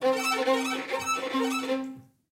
Orchestra Warming Up a little bit
orchestra, classic, strings, ensemble, orchestral